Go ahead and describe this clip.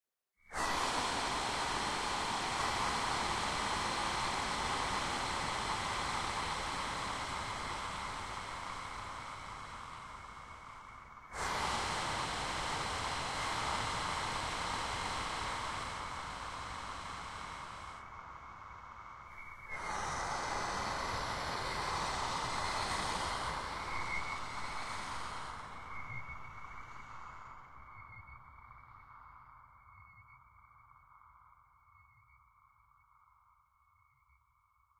brth sol3 4 tmty rngsft
Just some examples of processed breaths form pack "whispers, breath, wind". This is a granular timestretched version of the breath_solo3 sample with ringmodulation.
suspense, wind, air, tension, noise, shocked, processed